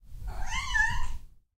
Catroom Meow 5
Recorded in a small bathroom using an MXL 993
cat, field-recording, meow